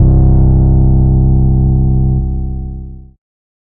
Acid Bass: 110 BPM C2 note, not your typical saw/square basslines. High sweeping filters in parallel Sampled in Ableton using massive, compression using PSP Compressor2 and PSP Warmer. Random presets, and very little other effects used, mostly so this sample can be re-sampled. 110 BPM so it can be pitched up which is usually better then having to pitch samples down.
synth, dub-step, bpm, acid, hardcore, noise, porn-core, glitch-hop, synthesizer, electro, effect, rave, bounce, processed, techno, sound, 808, trance, house, dance, 110, electronic, sub